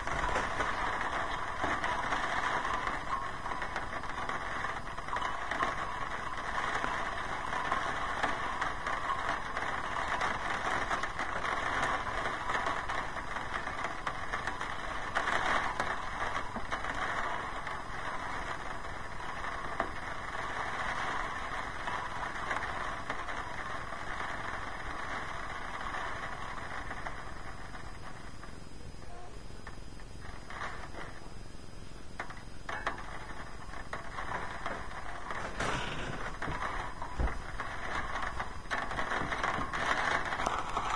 this was recorded indoor during a rainy day and the waster seem to splash of something don't know what but sound like metal plate or something the sound was recorded with my sylvania mp3 player and process in audacity lightly amp and remove clicking sound of me holding the recorder
rain, rain-storm, natural, nature, water, crasys, rainy, bad-weather